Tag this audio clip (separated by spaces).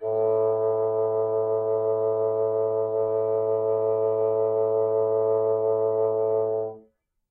a2 bassoon midi-note-45 midi-velocity-31 multisample single-note vibrato-sustain vsco-2 woodwinds